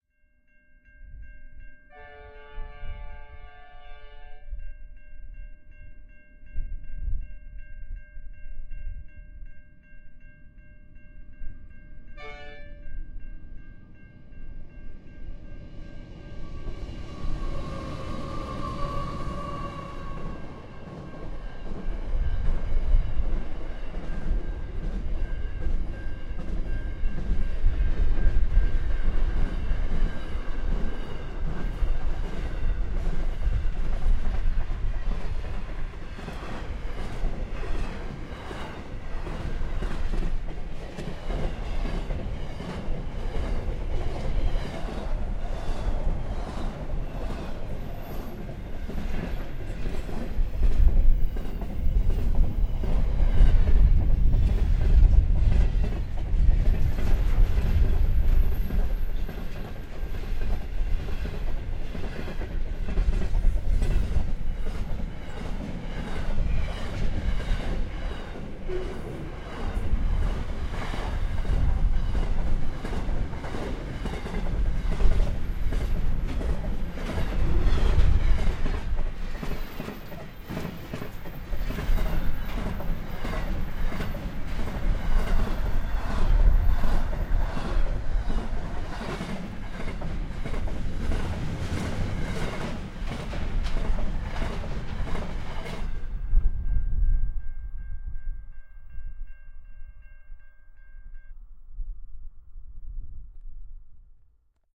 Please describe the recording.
Loco Passing
A stereo recording of a locomotive passing at speed at an intersection of road and rail. No , it is not the best recording. The opportunity presented itself so i had to make do with what was at hand. There is a bit of wind noise. Apologies